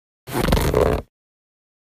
rub-handle-cardboard-box
10.24.16: A quick rub of an empty cardboard box (formerly a 12 pack of soda)
board, box, break, card, card-board, cardboard, carton, crunch, drum, eat, foley, handle, hit, natural, pack, package, rub, rubbing